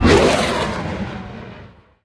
Fighter Jet 3
Celebrations took place in İzmit yesterday (on 25 June) on the 101st anniversary of its liberation during our war of independence against occupying forces. I recorded this fighter jet during its flight with TW Recorder on my iPhone SE 2nd Generation and then extracted some sections where not much except the plane itself was heard.
airplane, flyby, F16, F-16, aircraft, jet, flight, fighter-jet, flying, plane, aeroplane, military, warplane, fly, fighter